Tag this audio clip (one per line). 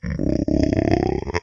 creepy
gargle
ghoul
growl
hiss
horror
moan
moaning
monster
roar
snarl
undead
zombie